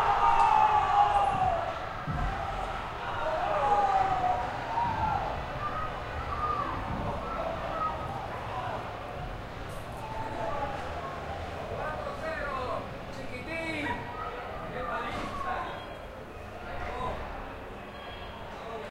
20060510.UEFAcup.4thgoal
people in my neighbourhood shouting GOOOL at the 4th goal during the final match of the 2006 UEFA championship. You can hear someone saying in Spanish "4 a 0, vaya paliza". Rode NT4>iRiver H120/ mis vecinos celebrando el cuarto gol de la final de la UEFA.
city, football, soccer, voice